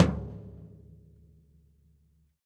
Slingerland1930sGeneKrupaRadioKingBopKitLowTuningTomFloor16x16
One of the most famous vintage drum kits, presented here in two tunings.